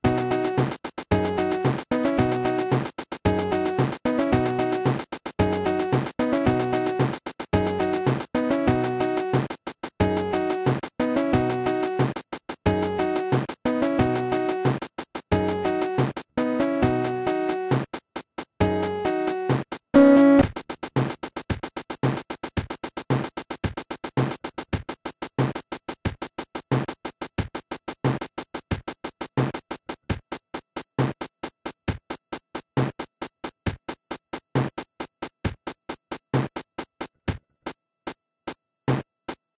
slow rock
The accompaniment section from a cheap kids keyboard - the description doesn't really match the sound.
The accompaniment plays at three tempos followed by percussion only version of the same.
lo-fi, cheesy, kitsch, fun, electronic, accompaniment, slow-rock, casiotone, auto-play